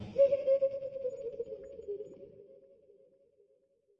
Creepy Stinger Chuckle
This is a chuckle, done by me, with a whole lot of effects added such as reverb, and chorus modulations.
Horror, Creepy, Chuckle, Stinger, Scary, Effects, Me, Old